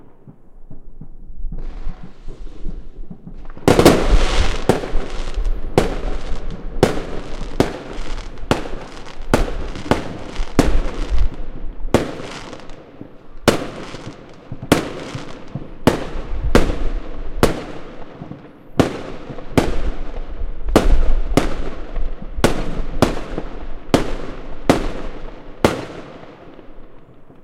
Firework foreground
New year fireworks